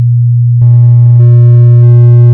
lullaby-recording
I produced a song in sine curve about 120Hz with an amplitude 1,0000 ; 2,5 sec. I used the Audacity's effects. The first one was "normalize" ; the second one "echo" 1,0000 sec and decrease factor 0,50000 ; To finish i changed the tempo at 65,886%.
cours, recording, lullaby